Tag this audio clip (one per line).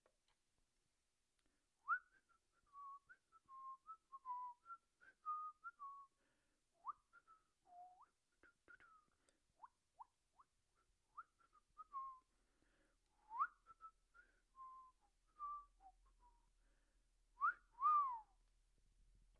man
whistling